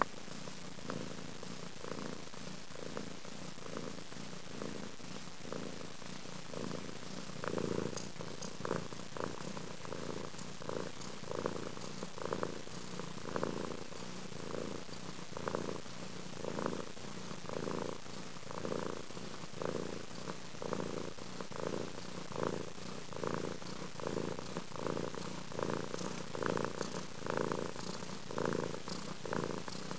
A cat purring contentedly while feeding her kittens. The purr speeds up when she sniffs my camera (used to record it)!
animal, cat, happy, kitten, mog, purr